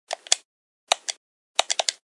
A collection of individual and simultaneous button hits while playing a game with a controller.